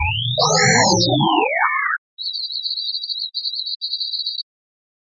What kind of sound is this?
strange alien sound
I don't know, it seems like an alien sound or something like that.
abstract, digital, noise, strange, virtual, weird